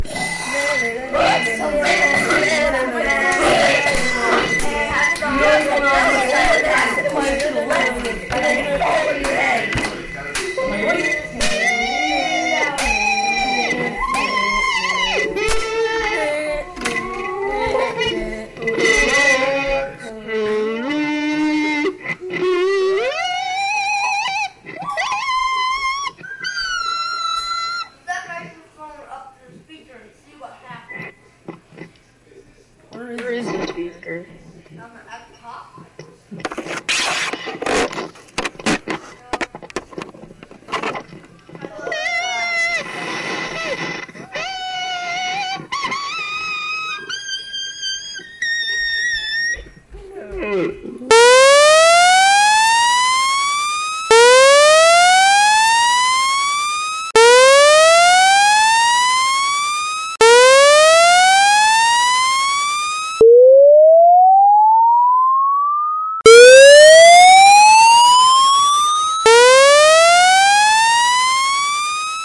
audacity maddness
wow, funny